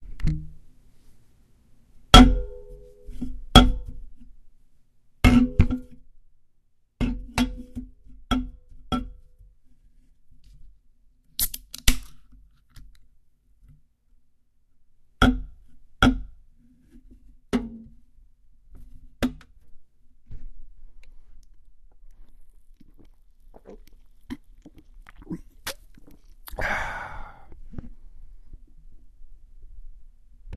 I set down a full, unopened can of beer several times on a hard surface. Then I opened the can of beer. Then I placed it down again and flexed the aluminum a bit. Finally, I took a long gulp of beer and let out a satisfied "Ahhhhhh!" Now I'm drinking the beer as I upload this file. Mmmmmm... beer.
crack, gulp, sip, can, booze, guzzle, beer, drink